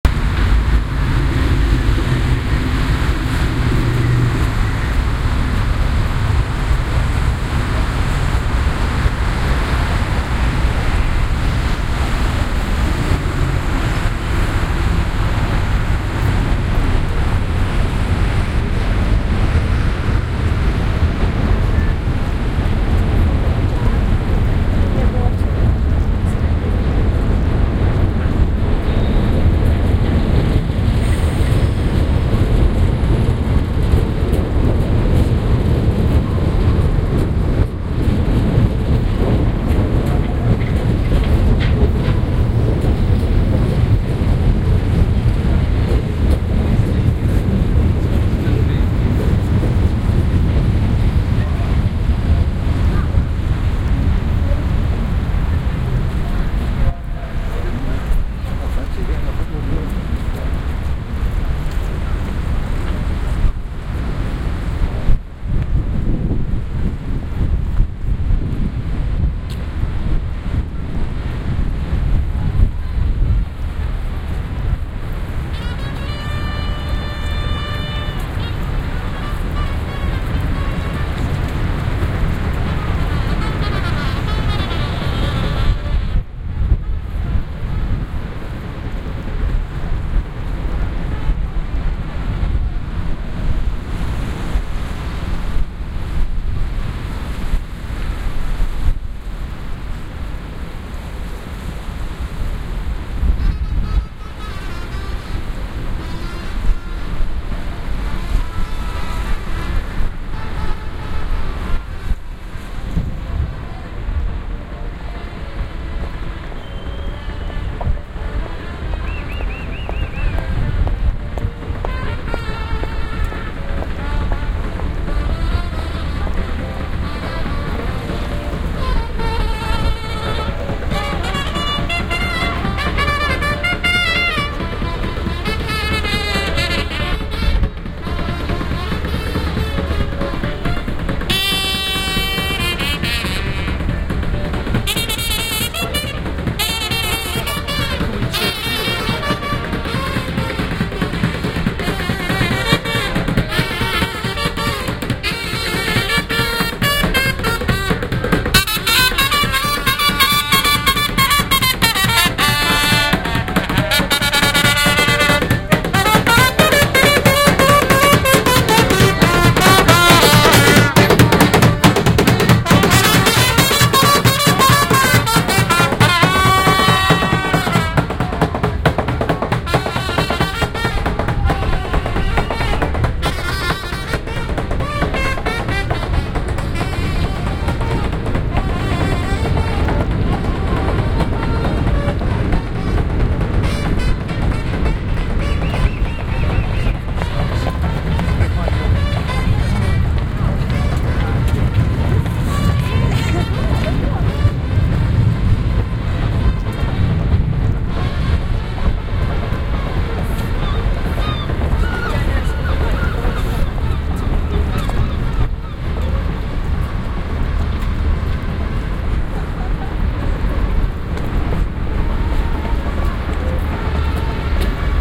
Embankment, walking across Waterloo Bridge
ambiance, background-sound, ambient, field-recording, city, soundscape, general-noise, ambience, atmosphere, london